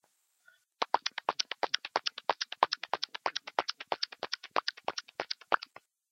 I love sounds. My senses have always naturally been fine tuned to appreciate the subtle cues in sounds, although, as an artist, I am more drawn to create music or visual art, rather than sounds, but I gave it my best try here. This is a sound I have been doing for years, since a very young child actually. Basically the sound is achieved by making three sounds with my mouth...1. sucking my lips together, and then opening my mouth, 2. bringing lips back together and forcing air out 3.and then the sound of my tongue pressed just under my front teeth, and flicking my tongue down. I do this very fast. Enjoy!
kid, happy, child, sounds, childhood, bored, mouth